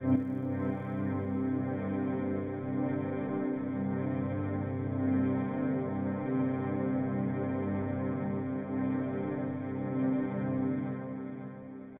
17 ca pads
sci-fi, score, white-noise, sci, ambient, horror, ambiance, background-sound, atmosphere, atmo, atmos, fi, city, atmospheric, music, ambience, amb, general-noise, soundscape